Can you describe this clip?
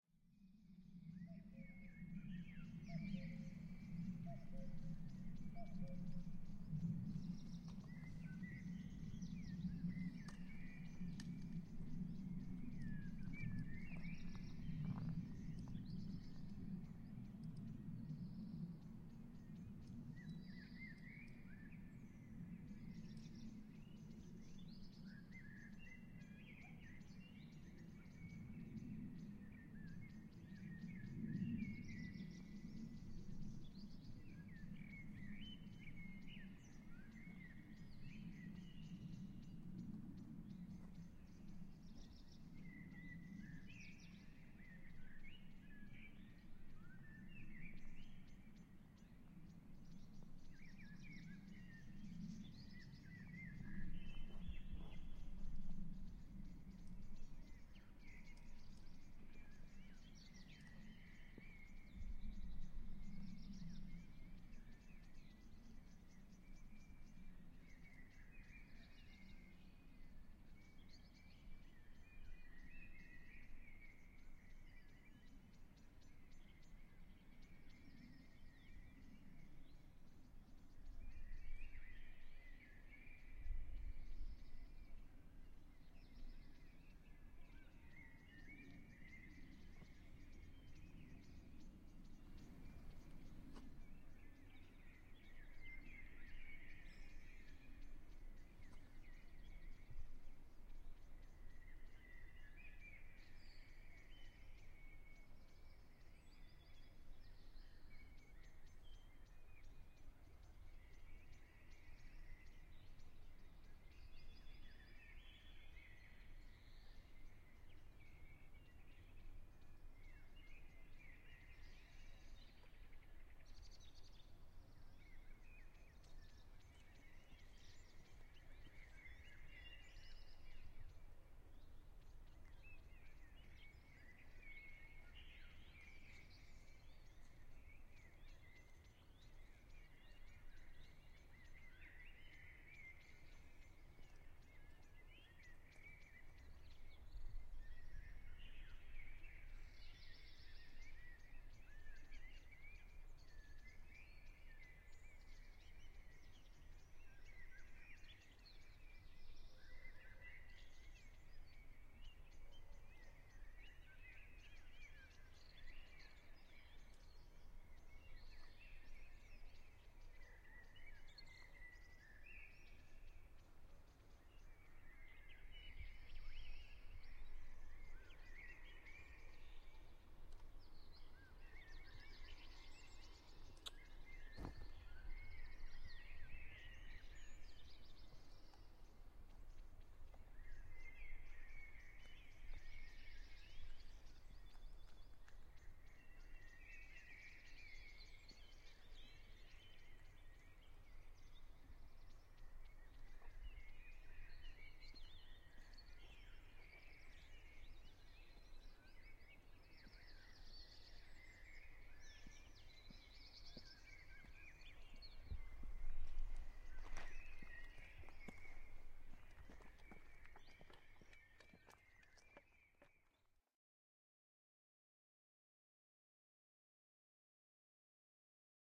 atmosphere - village evening 2
Atmosphere recorded around 9 pm.